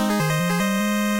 SFX-Success!
An 8-bit jingle that tells you you did it right!